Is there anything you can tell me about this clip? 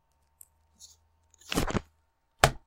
Me peeling a sticky note and putting it on my table. Recorded and edited in Audacity.
Bizinga
postit, postit-note, audacity, Sticky-note